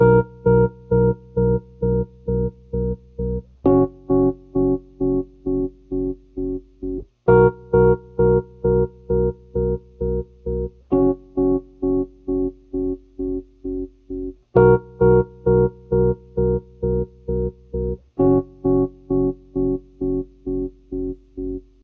Some Rhodes chords though a crappy tremolo pedal. Approximately 66BPM. Use only as directed.
chords, loop, piano, rhodes, tremolo